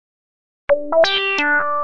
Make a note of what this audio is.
A simple melody line from a softsynth.